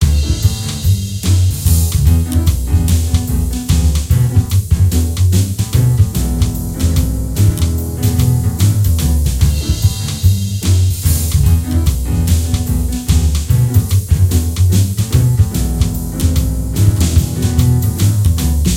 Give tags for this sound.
music; videogamemusic

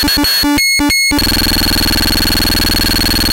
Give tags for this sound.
Beep; game; electronic; FM; 16bit; robot; Frequency; Digital; 8bit; circuitry; Beeping; synthesiser; Modulation; chip; synthesizer; computer; 16-bit; robotic; synth; 8-bit

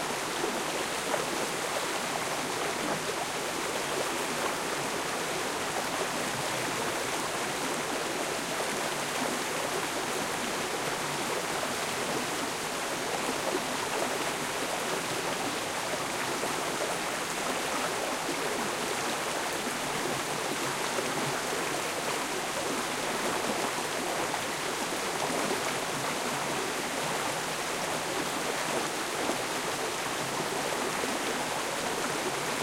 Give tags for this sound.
small; waterfall; brook; river

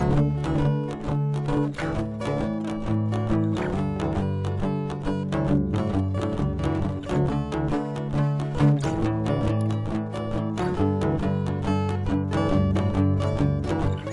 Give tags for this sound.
guitar; loop; original; upbeat

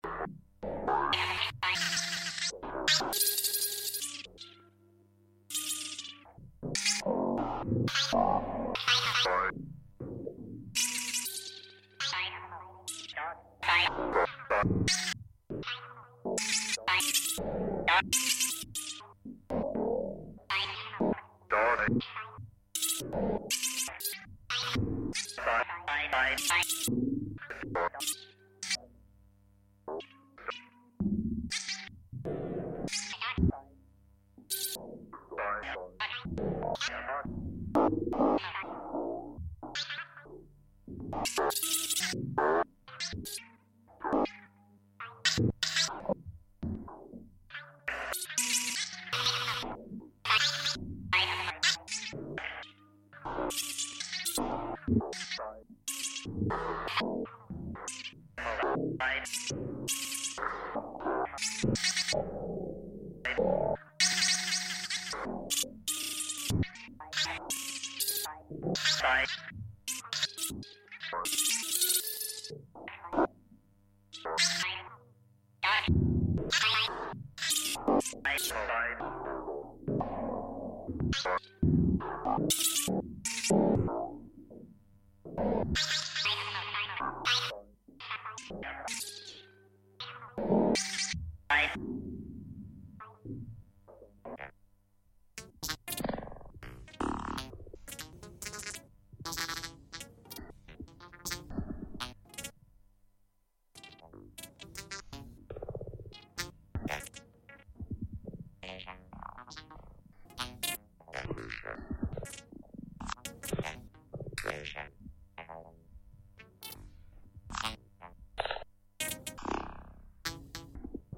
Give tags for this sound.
ambient; Blofeld; drone; eerie; evolving; experimental; pad; soundscape; space; wave; waves

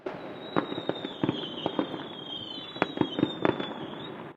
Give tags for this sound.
explosion; firework; foreground; new

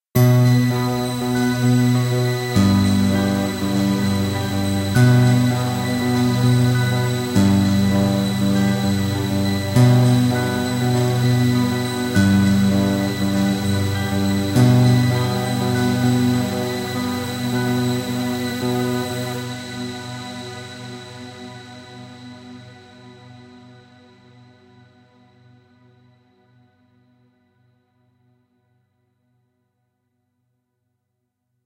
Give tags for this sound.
rumble; atmosphere; sci-fi; effect; electronic; hover; bridge; future; noise; emergency; background; drone; spaceship; impulsion; machine; soundscape; futuristic; ambience; sound-design; energy; drive; Room; pad; ambient; space; fx; deep; dark; starship; engine

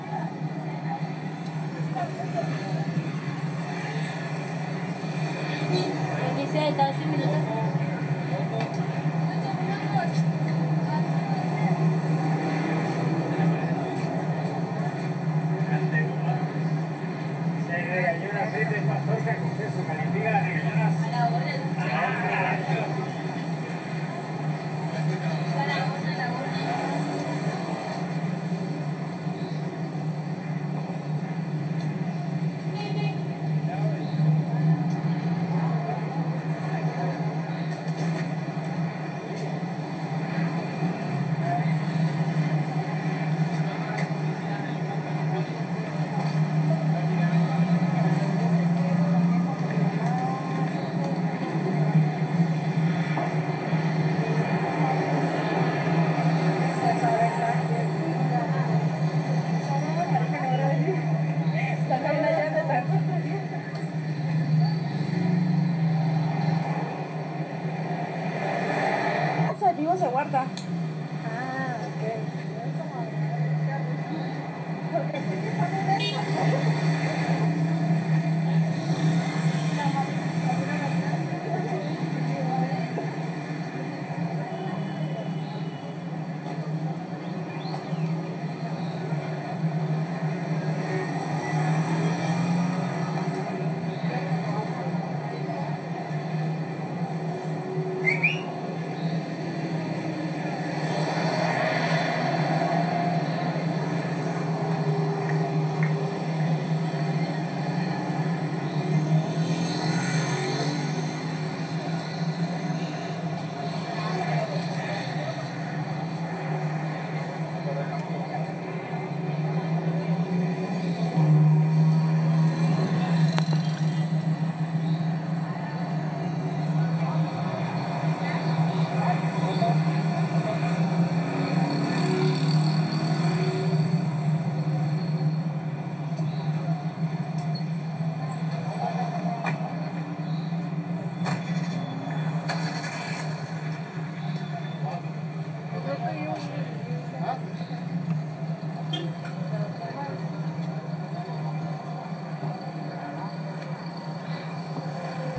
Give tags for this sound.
Paisaje; registro

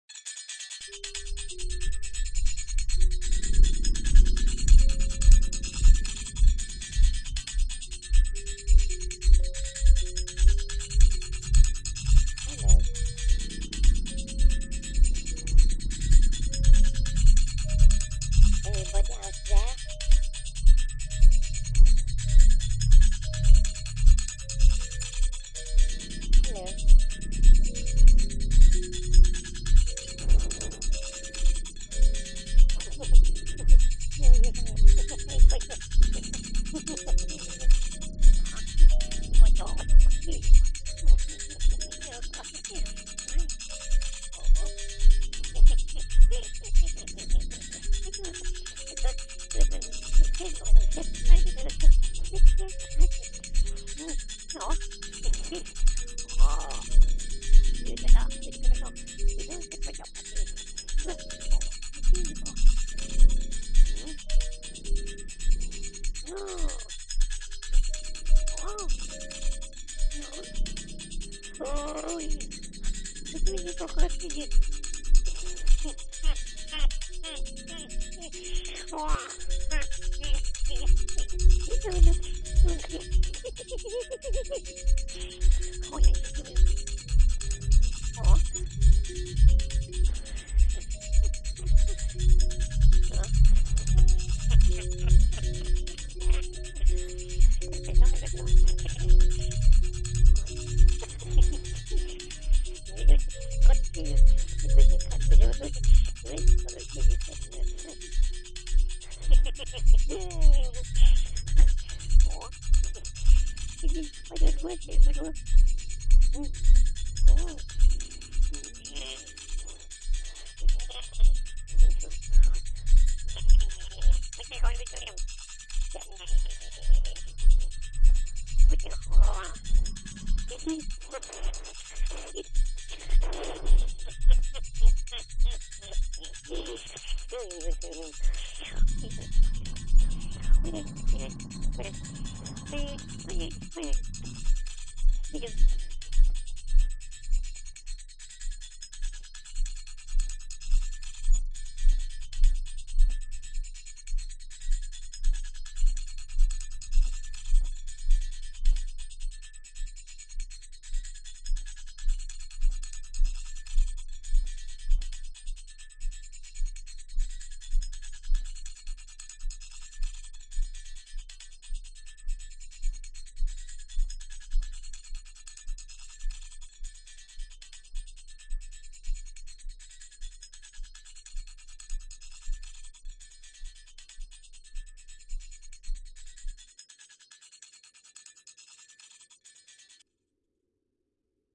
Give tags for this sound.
adventure; call; diesel; Engine; Entertainment; old; Telephone; tramp

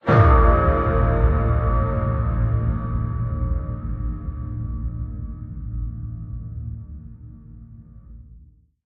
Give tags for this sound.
hit; metal; steel

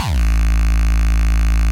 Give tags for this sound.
hardstyle; gabber; pitched; style; aggressive; hardcore; kick; hard; nu

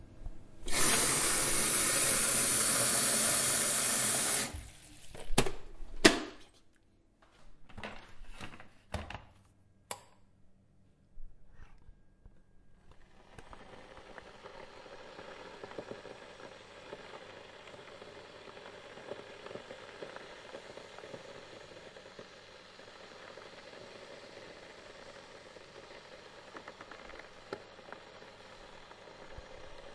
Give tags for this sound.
water; mono; field-recording

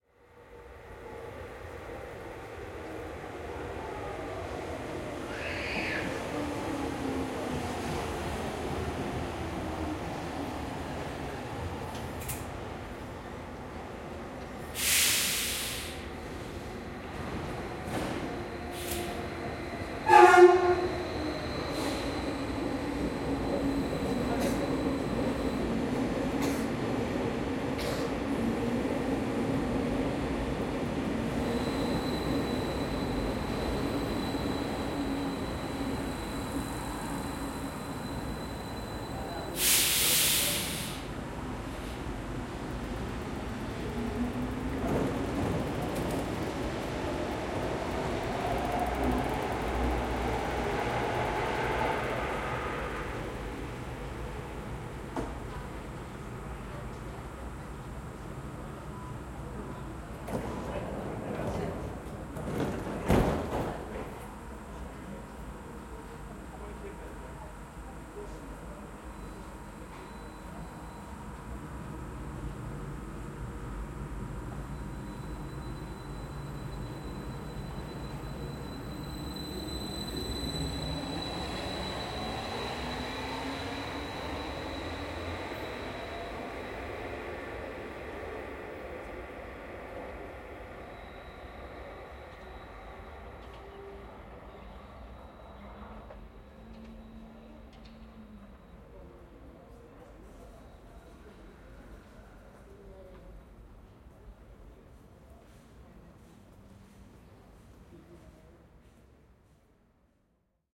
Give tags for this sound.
city; general-noise; metro; people; soundscape; suburb; suburban; town; trains

